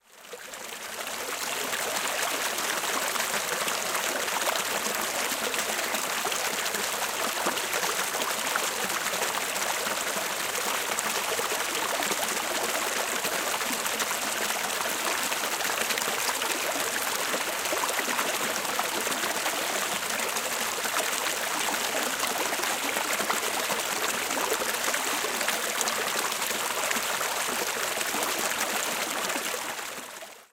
Very close field recording of water flowing through some rapids in a creek.
Recorded at Springbrook National Park, Queensland with the Zoom H6 Mid-side module.